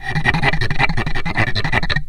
ape.rubba.12
daxophone, instrument, friction, wood, idiophone